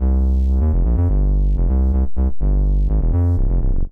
Bass Smooth One - 2 bar - 125 BPM (no swing)
bass, loop, synth